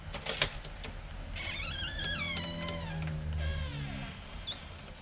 Wooden door gets opened